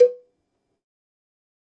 MEDIUM COWBELL OF GOD 013
drum, cowbell, more, pack, god, kit, real